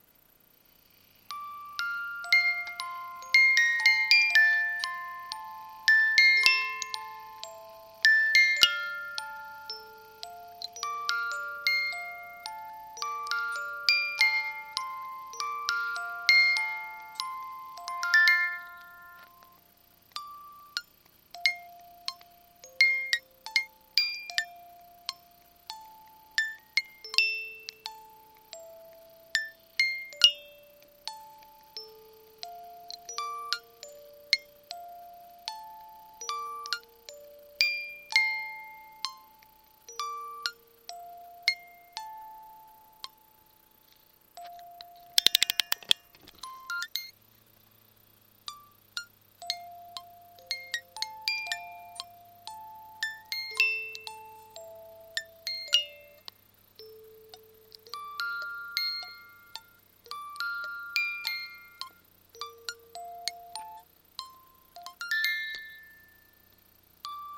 creepy music box
I used Audacity to record this sound with no editing. I used Turtle Beaches x12 to record. The sound was from an old antique wind-up toy mechanism (Music box) that was made in Japan. I covered the metal with my finger to change tone of the song. The song played on the music box was called "Close To You". reminds me The Simpsons Movie.